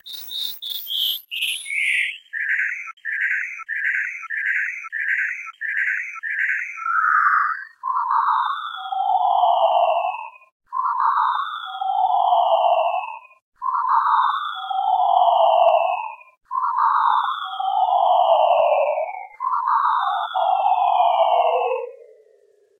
Robotic Chirping from whitenoise with Knock
Further manipulation regarding the shape and pitch of chirping (-ish?) noise
this one also caught a knocking effect by dint of a sharp gap in the copied wave shape [accidental but noted for future use]
chirp, high-pitched, noise, synthetic, white